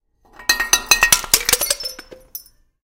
accidental glass breaking
glass bottle being dragged across a heater until it shatters